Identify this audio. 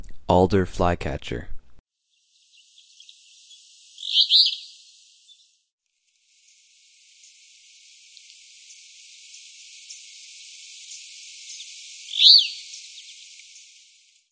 This is one of the tracks of the songs/calls that I recorded in 2006.